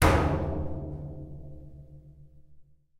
Single hit on a small barrel using a drum stick. Recorded with zoom H4.
barrel, metal, percussive, single-hit